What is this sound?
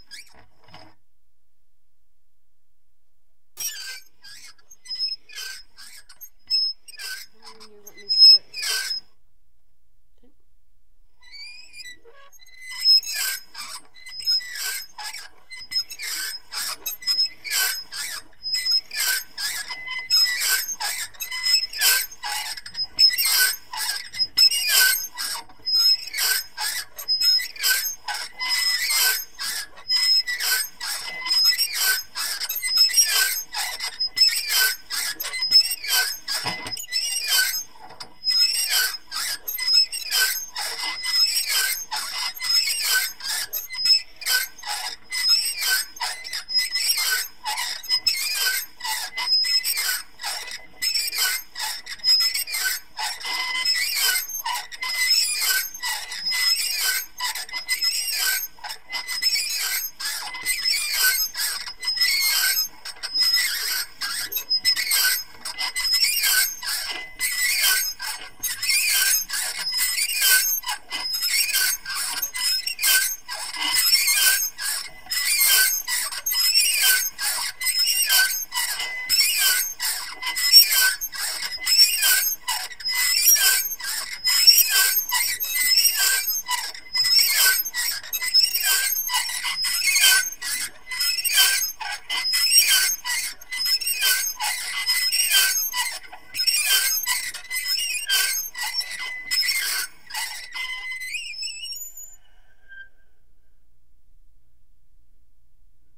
Meat Grinder04M
I recorded these sounds made with a toy meat grinder to simulate a windmill sound in an experimental film I worked on called Thin Ice.Here is some medium speed squeaking.
416, metal, mono, squeaking, using, dat, recorded, toy